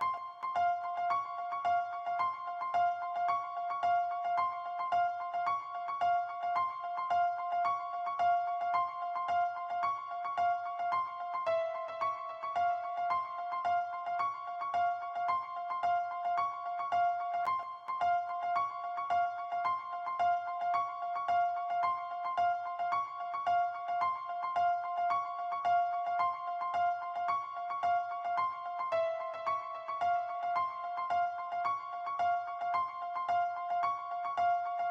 piano arp trap, 110 BPM